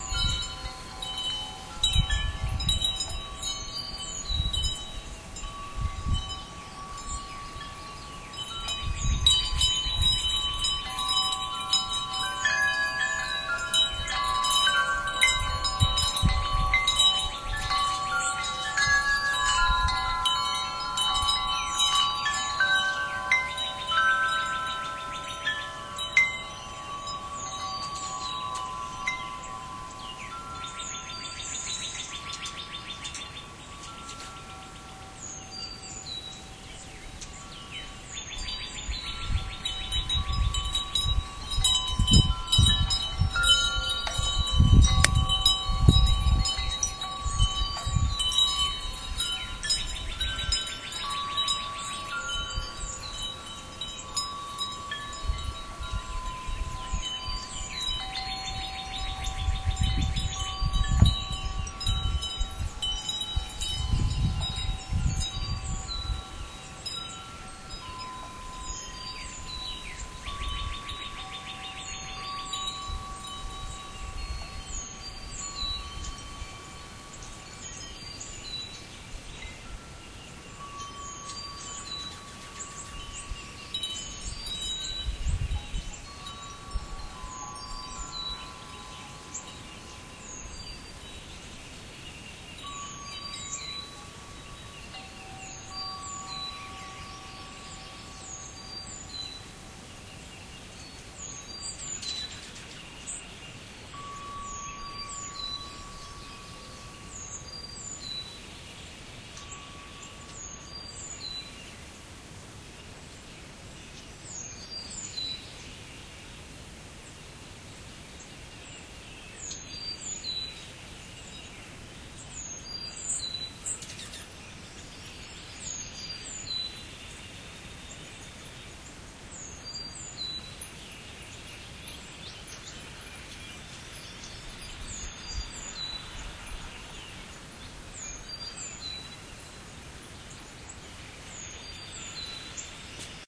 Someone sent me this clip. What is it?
morning birds and windchimes
Some morning birds and some of our windchimes.
chimes, birds, bird, windchimes